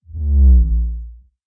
Transporter Passby
A stereo recording of a futuristic vehicle passing.Download sounds much better than preview. Probably needs subwoofer to hear correctly.